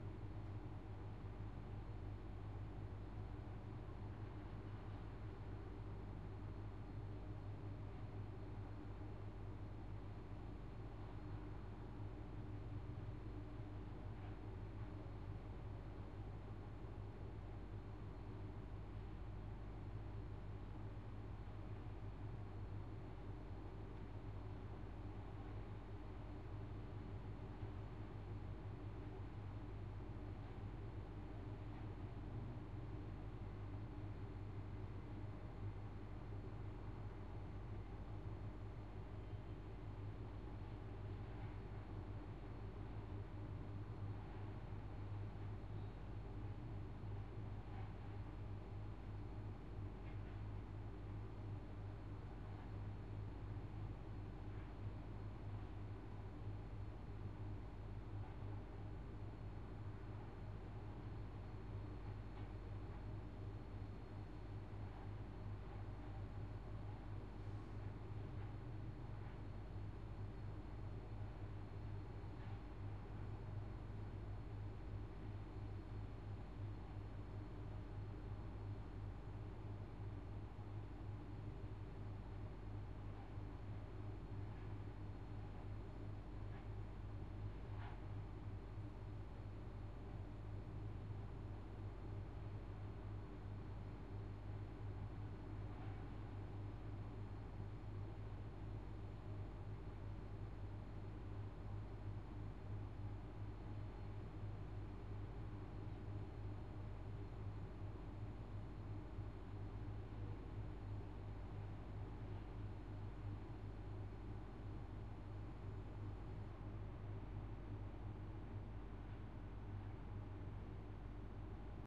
Room Tone Office Industrial Ambience 06
Tone, Indoors, Ambience, Office, Room